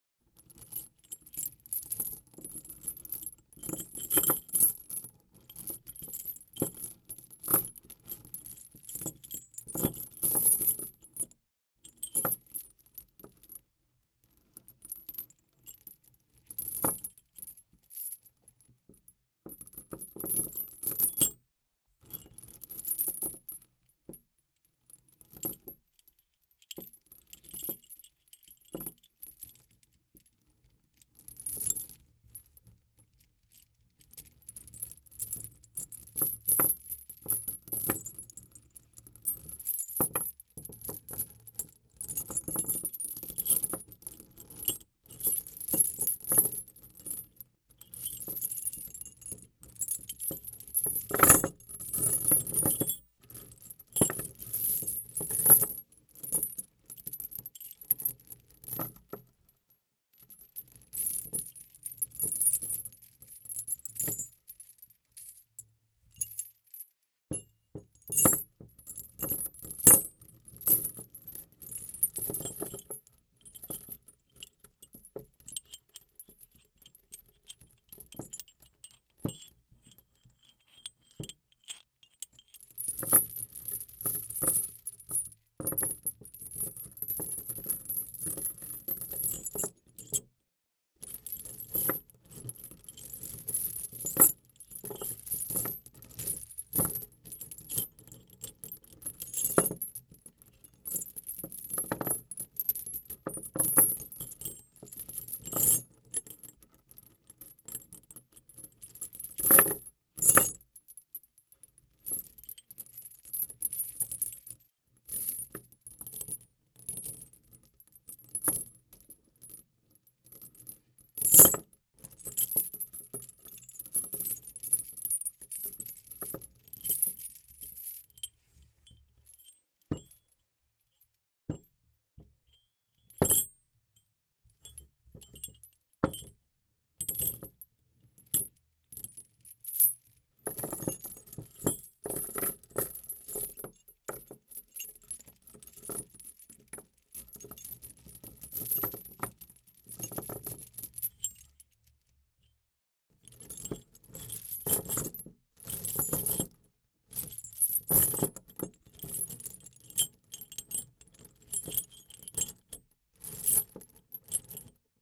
20180427 Iron chain
metal, metallic, Foley, rattling, rattle, chain, prison, onesoundperday2018, iron